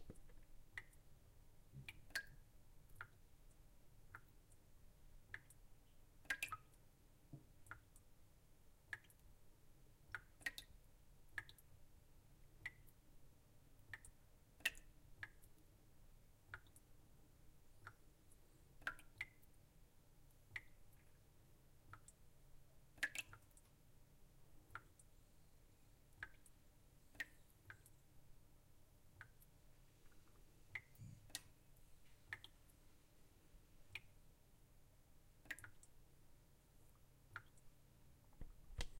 WaterDrops in kitchen sink
Drops of water dripping from a faucet and a bowl placed in my sink. Making a random rhythmic pattern. Recorded on a ZOOM H4n.
tap, drops, dripping, kitchen, water, faucet, running, drop, drain, sink